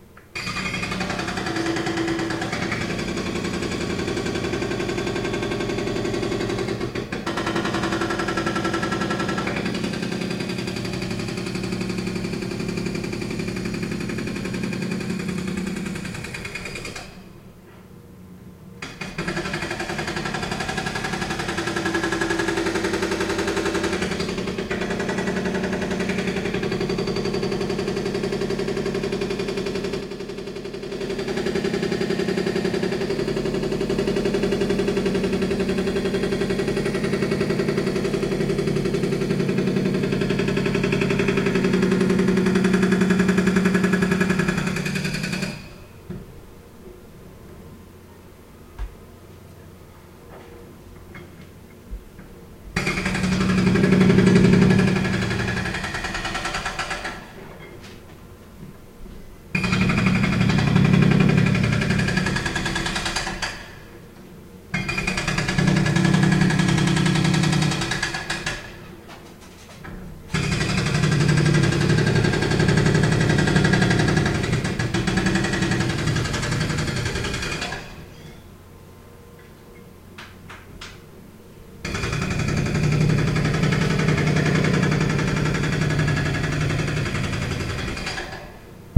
The sound of a Jackhammer working on concrete.
Sound recorded with Samson Q1U.
constructing, hammer, Jackhammer